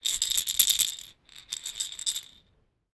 Rattle Toy infant stuffet animal 1.L
small stuffed toy rattle movement
baby, rattle, toy